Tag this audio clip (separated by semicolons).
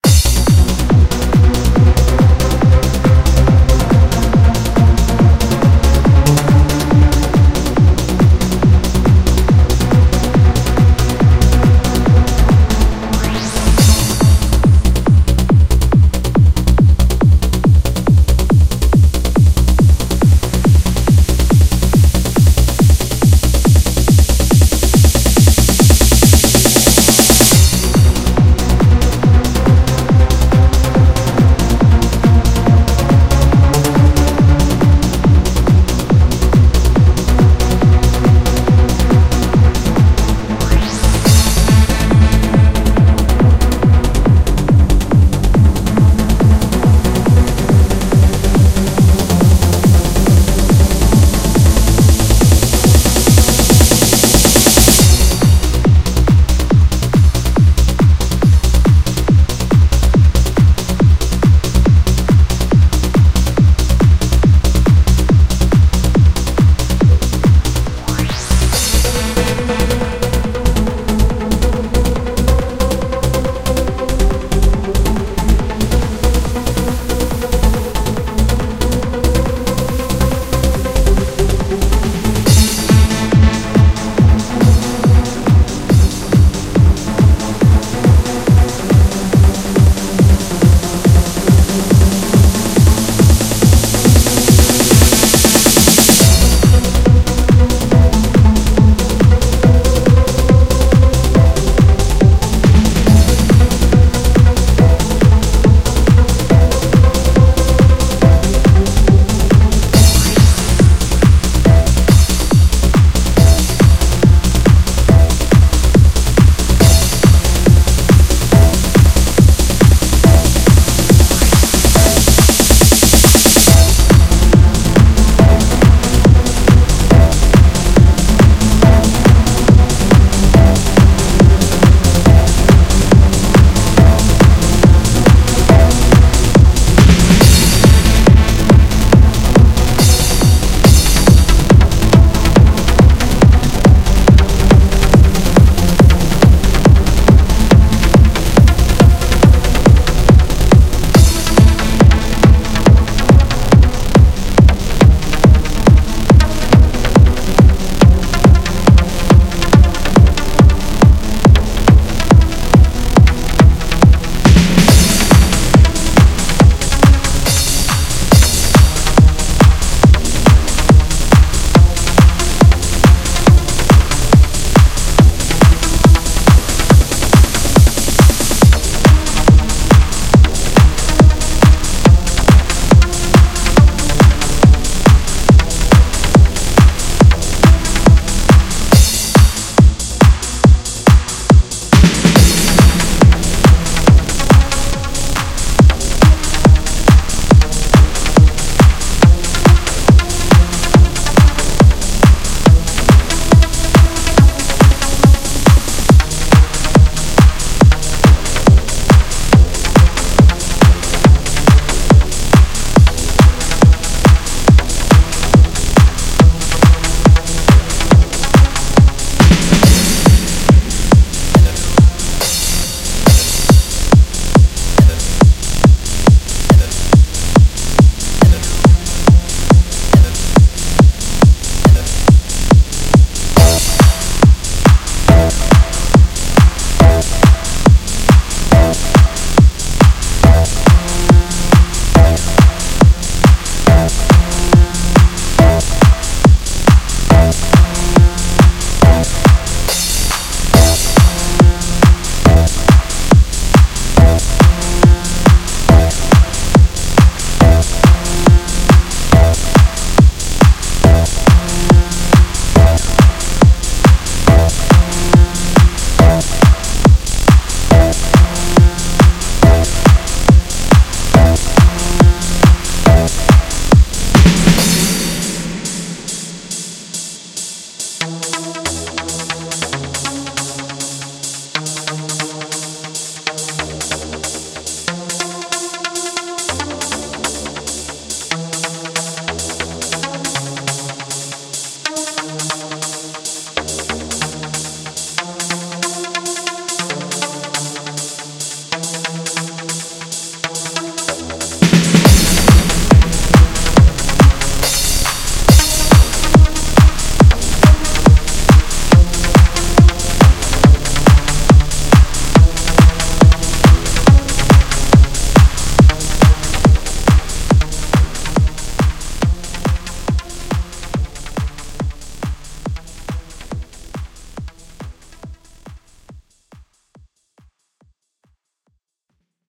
beat-matching; club-rave; dance-techno